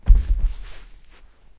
TATAMI is the Japanese traditional carpet. It made of green plants (IGUSA).